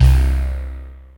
03 Eqx Beezlfs C2
Mapped multisample patch created with synthesizer Equinox.